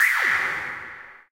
Short button sound. Recorded, mixed and mastered in cAve studio, Plzen, 2002
ambient, hi-tech, switch, click, synthetic, short, press, button